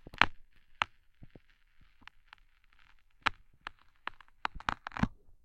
cutting carrot 4
Cutting a carrot with a large cleaver, for variety, as slowly as possible. Recorded with a Cold Gold contact mic into a Zoom H4 recorder.